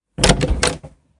Grabbing Door Handle
I grabbed the handle... Hi-tec amiright?
Noticed that my door was quite loud - so I recorded some sounds of it with my phone close to the moving parts of the door.
wood, help, phone, door, recording, close, fx, mechanical, opened, foley, closing, umm, idk, grab, open